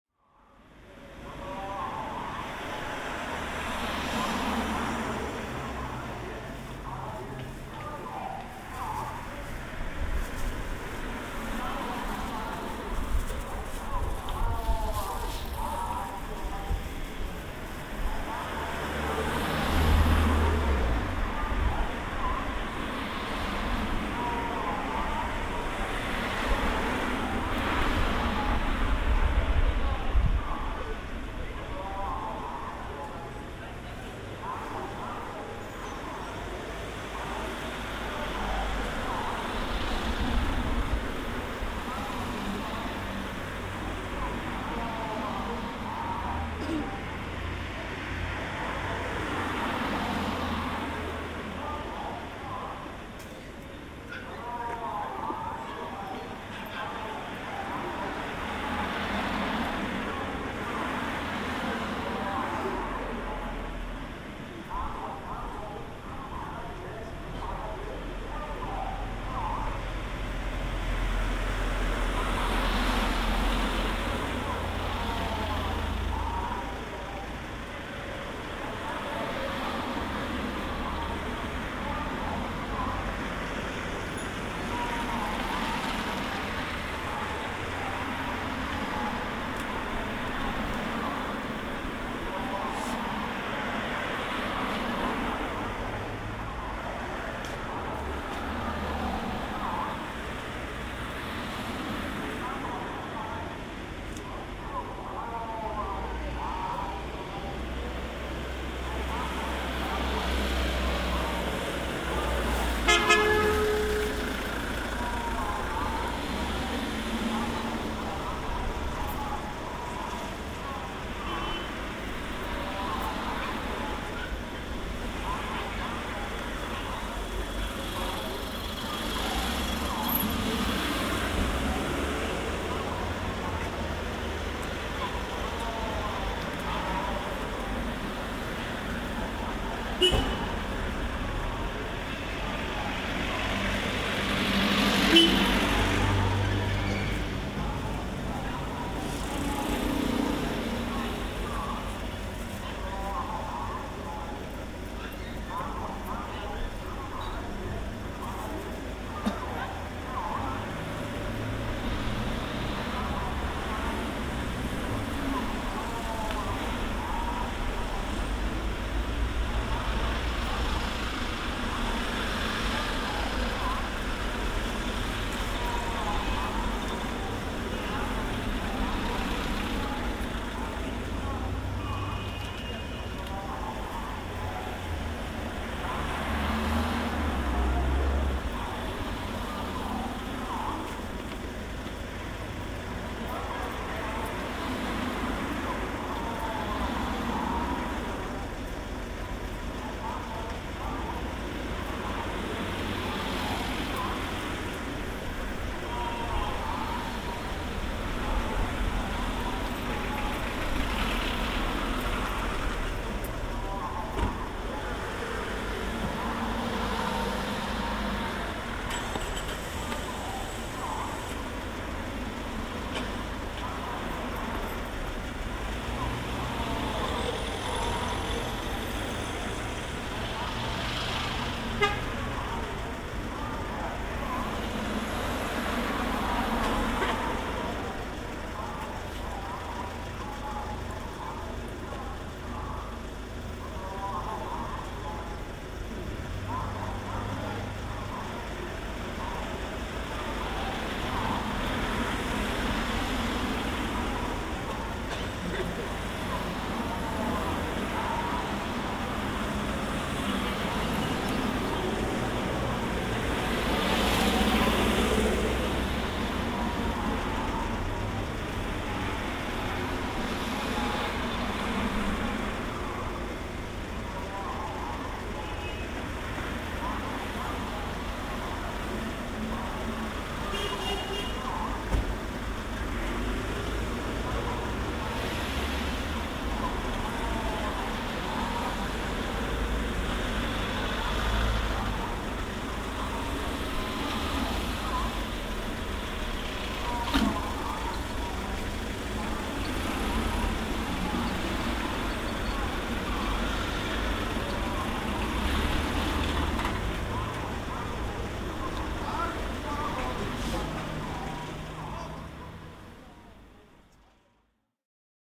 Ambience Downtown Mokpo Bus Stop
Recorded in downtown Mokpo, South Korea. Basically just recorded standing at a bus stop on a fairly busy road. Many cars go by, some motorcycles, there's some horns honking, a little bit of Korean language, and you can hear a fruit truck with a loudspeaker (very common in Korea!).
field-recording, street